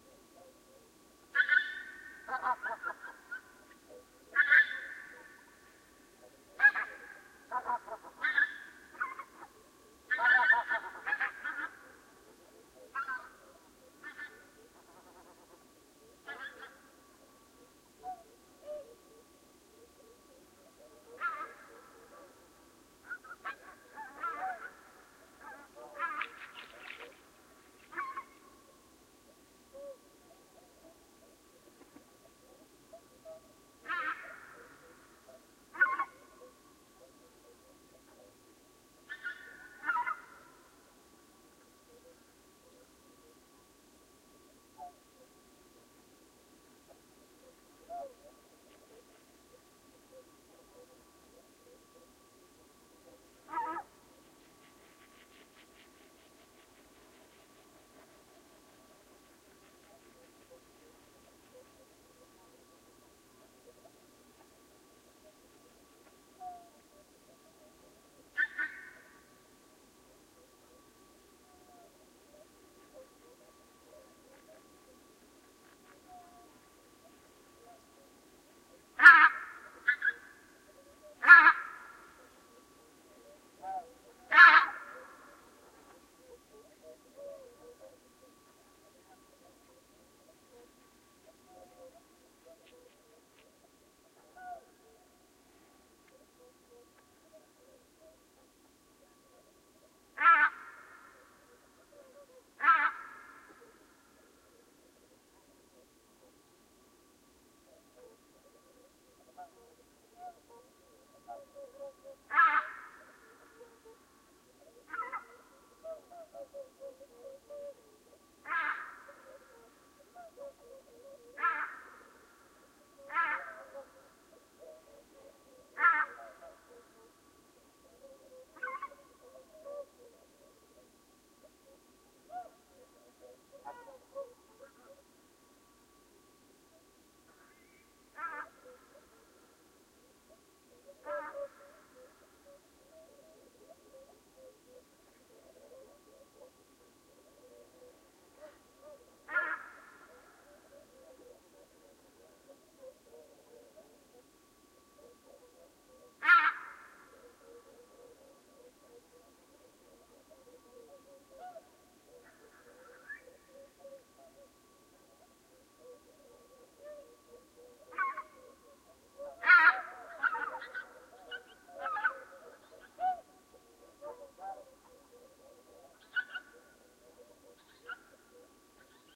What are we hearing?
Pinkfoot Geese Roosting
Late night recording of roosting Pinkfoot Geese. Some Berwick swans nearby. Mic Em-172 pair in parabolic dish. olympus-lS-5
field-recording, Marsh